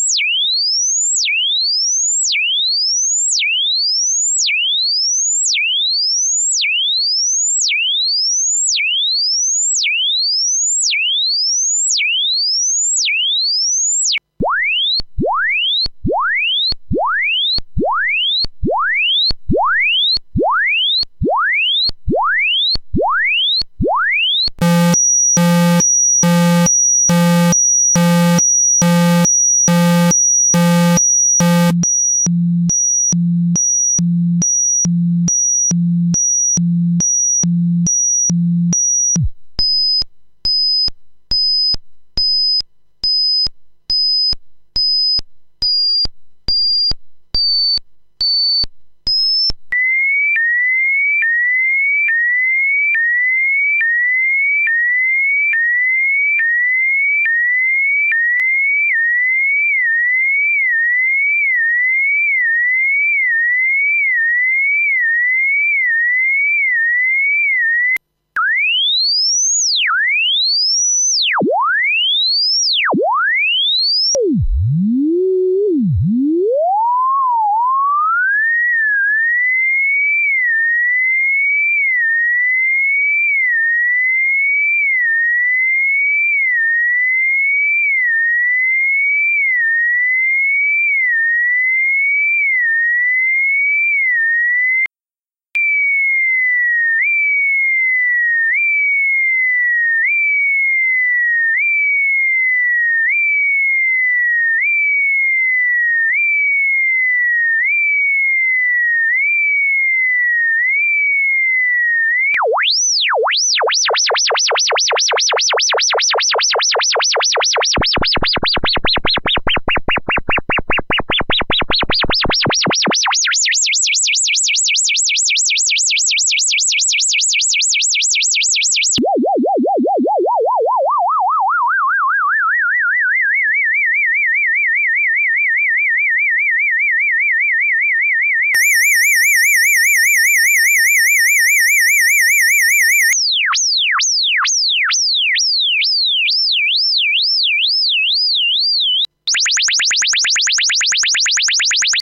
Alarms and sirens, created by playing around with an old function generator